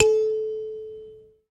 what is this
SanzAnais 69 A3 bz forte
a sanza (or kalimba) multisampled with tiny metallic pieces that produce buzzs